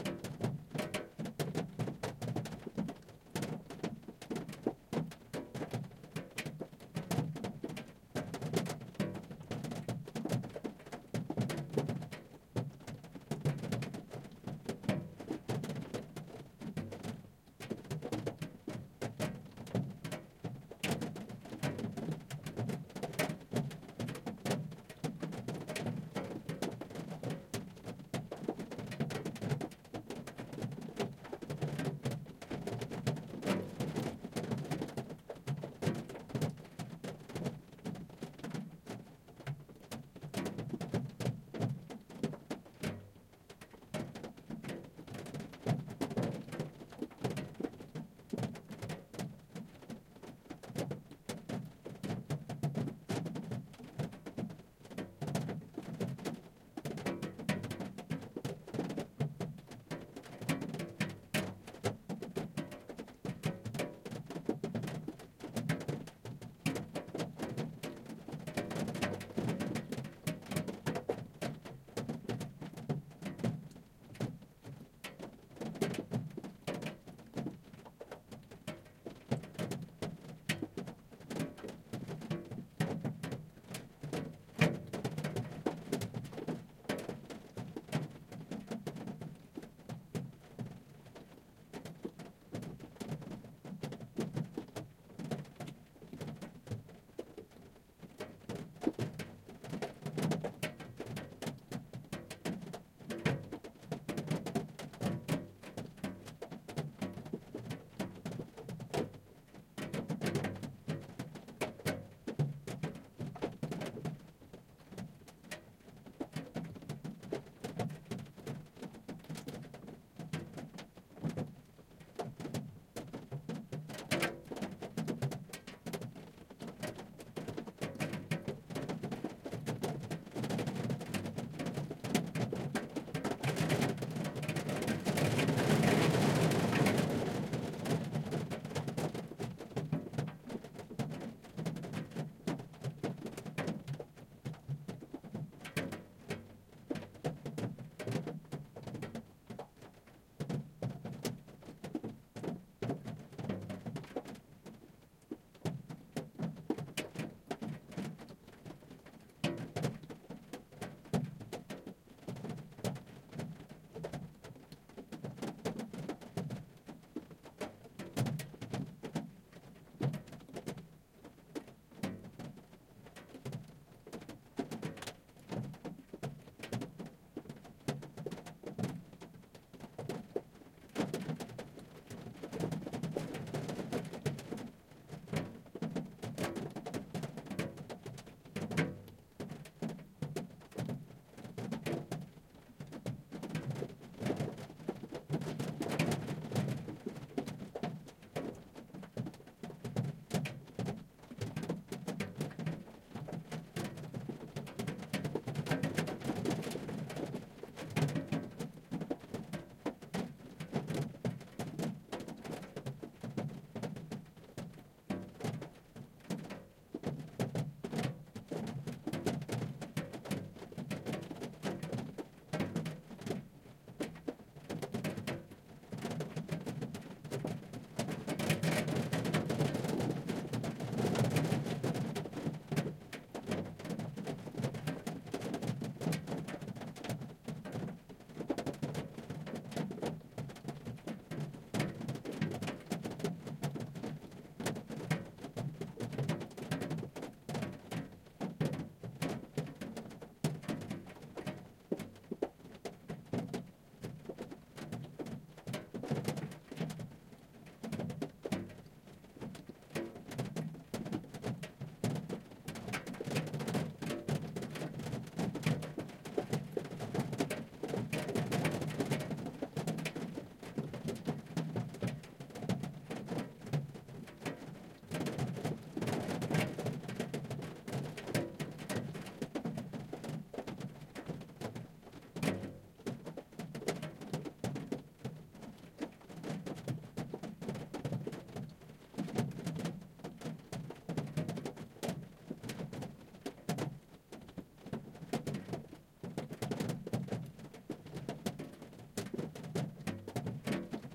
170723 CarInt Rain R
4ch-surround field recording of the interior of a car during heavy rain.
Very neutral with no other contaminating noises, so it's ideal as a backdrop...
Recorded with a Zoom H2N. These are the REAR channels of a 4ch surround recording. Mics set to 120° dispersion.
ambience, atmo, car, field-recording, interior, surround, weather